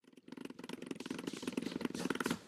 Quick Tapping on Keyboard
The sound of tapping on a keyboard with its playback rate and pitch increased. Used with a MacBook Pro microphone.
rate, quick, f13, tapping, playback, increased